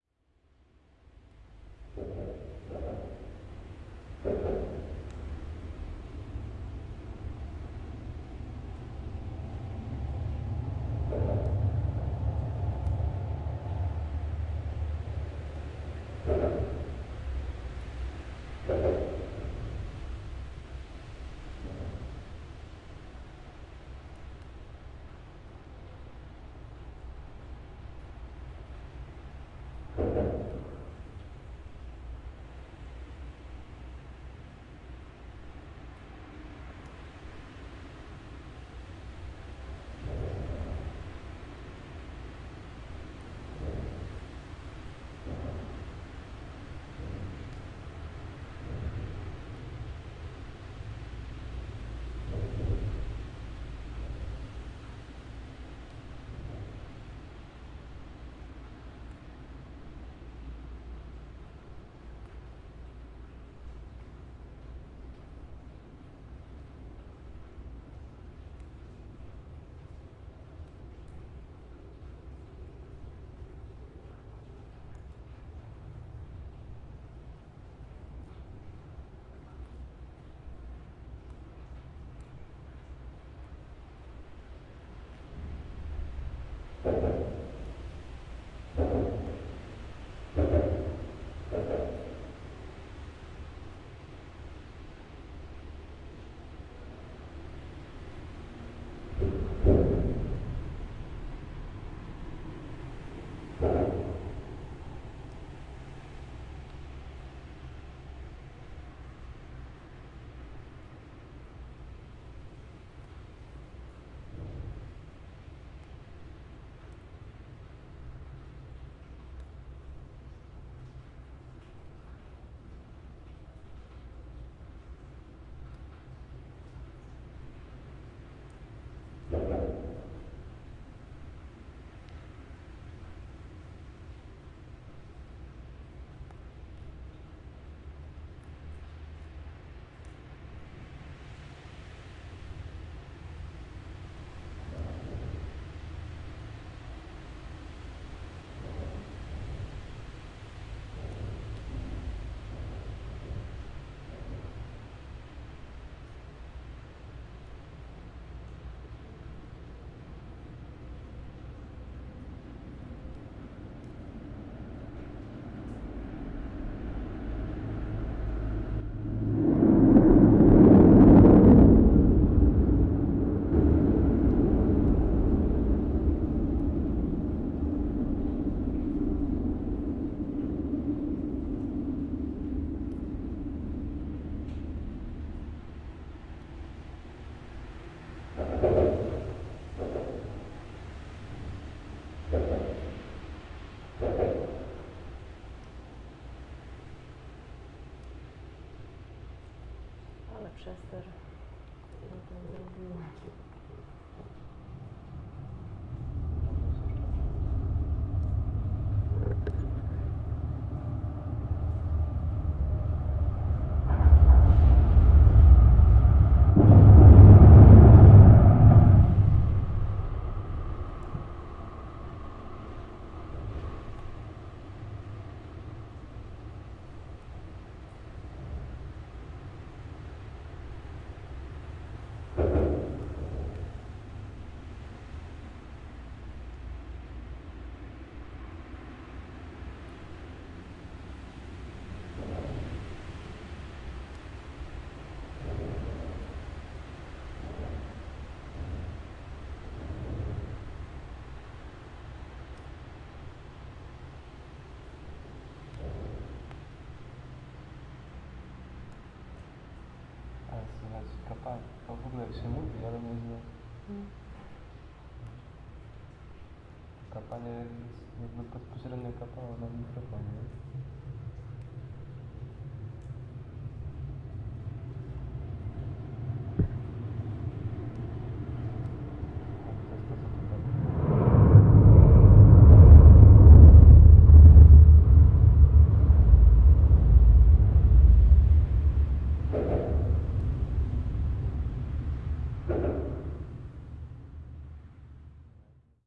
29.07.12 under the bridge
29.07.2012: recorded at night under the one of bridges in Poznań (Poland). Intense sounds of passing by cars, trucks and tramways. Recorder - zoom h4n (internal mikes).
noise, tramway